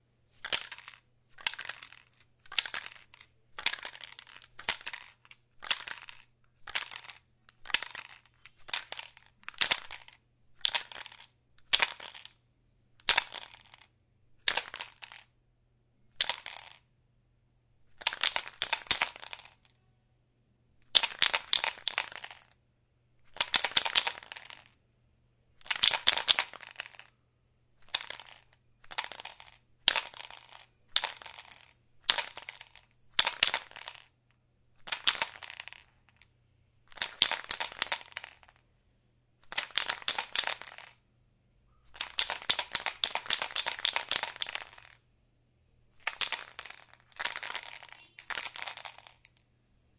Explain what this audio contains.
shake spray can
Shaken not stirred :)
multiple shakes, single shakes, fast slow, pick up sound at the end.
I needed that for a movie I am working on. Amazingly I recorded it using the h4n in my room. tell me what u think guys
paint, spraycan, aerosol, spray-paint, can, shaking, shake, spray-can, spray